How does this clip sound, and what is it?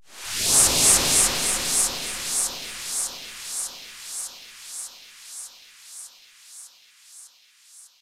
Starsplash Flicker
strings, synth, techno, sequence, flange, beat, melody, pad, phase, trance